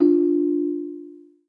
Bong Chime 3
Part of a games notification pack for correct and incorrect actions or events within the game.